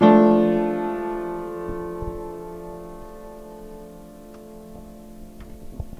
Me and a friend were allowed access into our towns local church to record their wonderful out of tune piano.
ambience, ambient, atmosphere, cathedral, church, field-recording, prague